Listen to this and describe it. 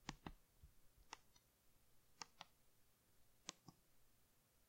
Sound of a button pressed of a videoprojector remote-controler